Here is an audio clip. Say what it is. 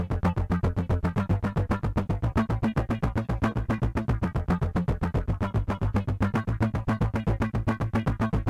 Arp3LO
Created with a miniKorg for the Dutch Holly song Outlaw (Makin' the Scene)
arp, bass